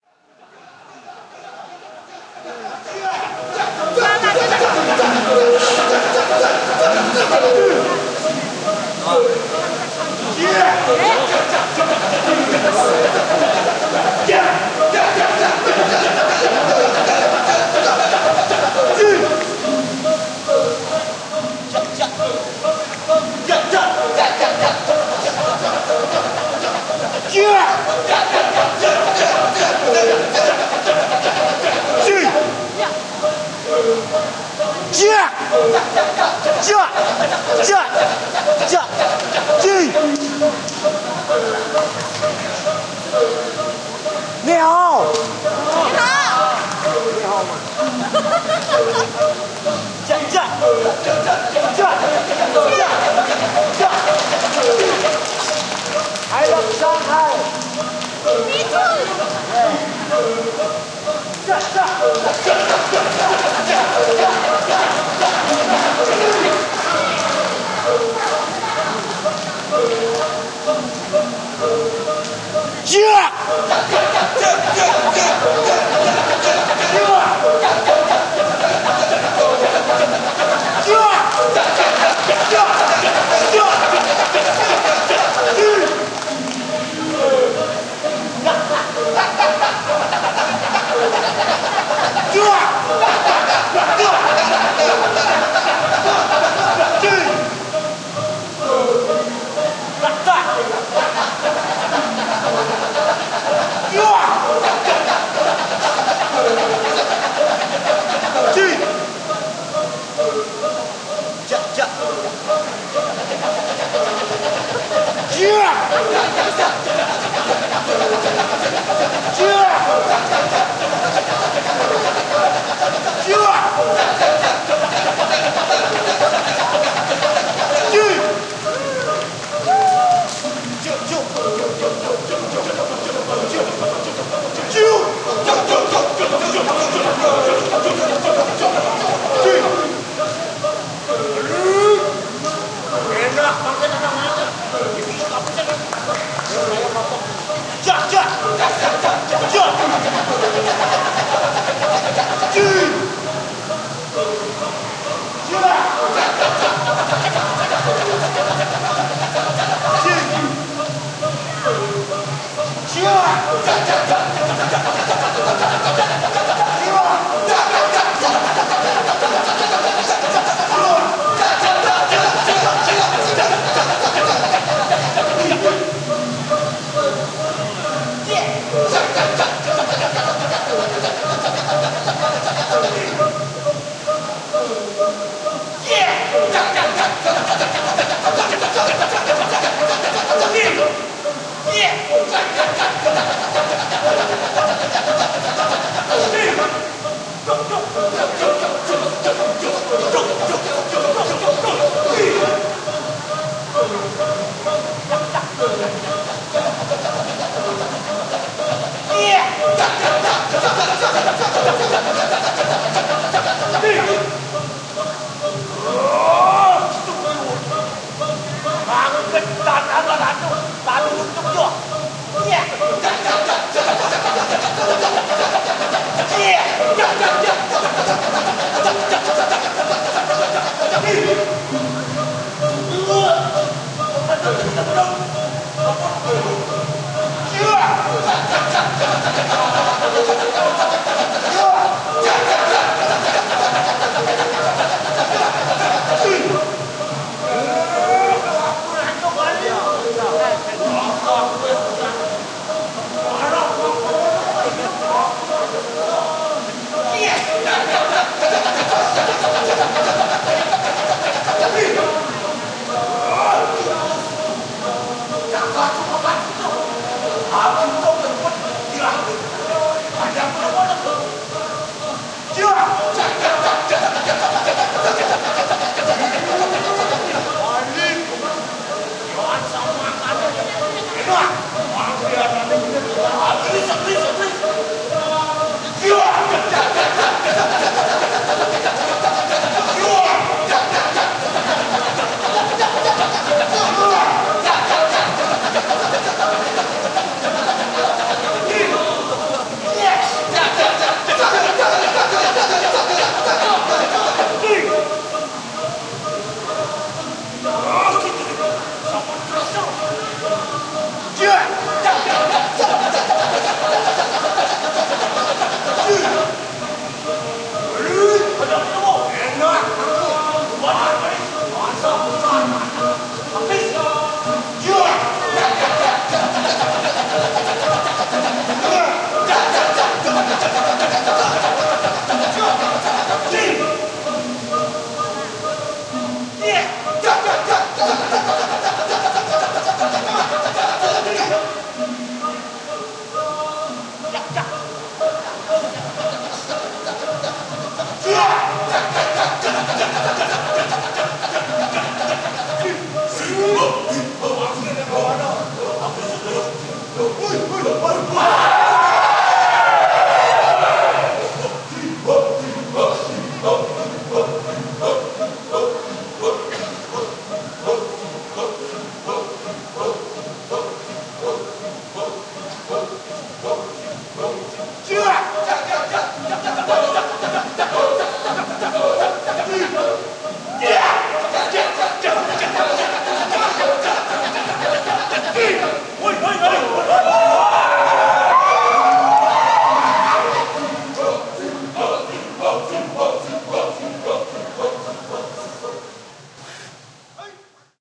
Kecak - Monkey Chant
Indonesian Monkey Chant (Kecak) recorded at the Indonesia Pavilion, World Expo, Shanghai.
monkey, Ramayana, trance, dayak, Monkey-chant, filed-recording